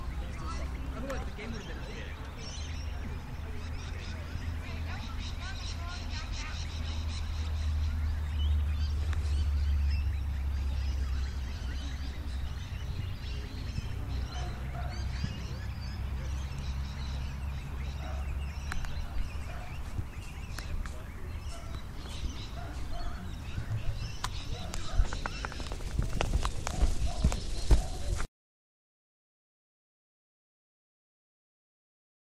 Recorded on an MP3 player using the voice recorder. Recorded at the Concord RSL Women's Bowling Club on a Sunday. Recordings of bowls ambience, includes birds and talking.
australia, birds, english, field, grass, recording, sport